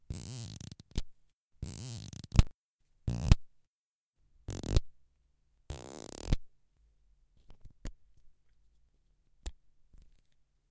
Whiteboard marker cap-off
the squeaky sound when you take the cap off a dry erase marker. recorded with zoom h4n
cap, dry-erase-marker, marker-cap, marker-squeak, marker, magic-marker, squeak